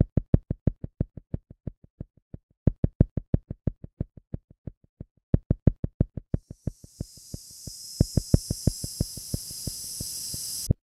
A noise of striking on a microphone, with a delay effect. Made with Cubase SX 3 and Reason 3.